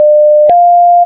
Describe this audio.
Siren-like tones
Tones like a siren. Tones generated in Audacity.